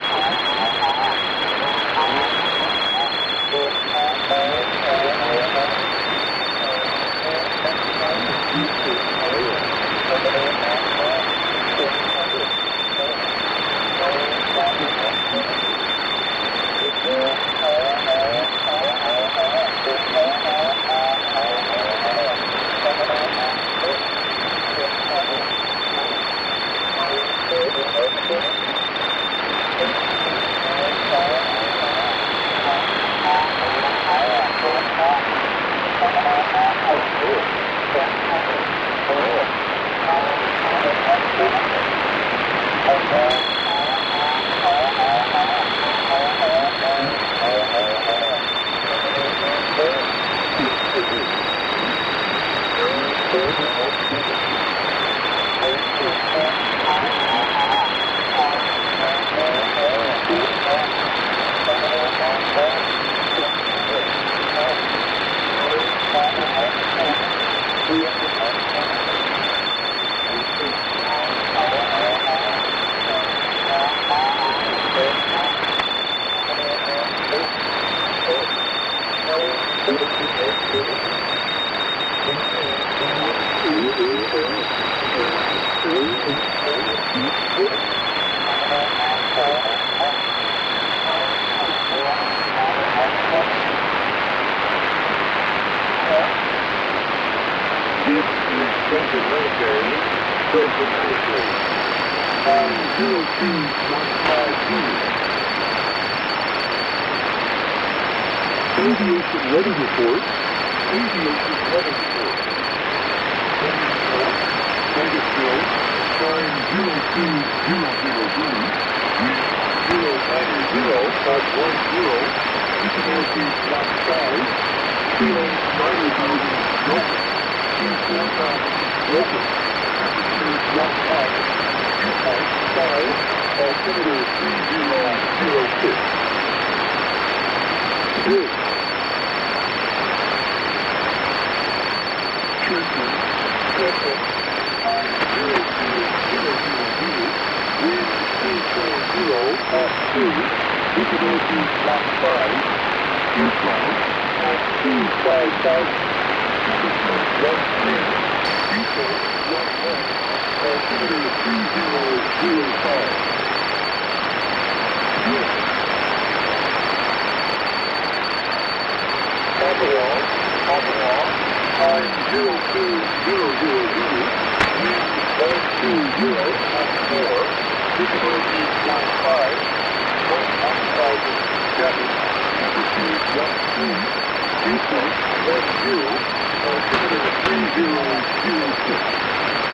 Radio interference on assignment operator (I'm not a ham radio operator, does anyone could clarify what exactly it is in the comments? Thanks so much)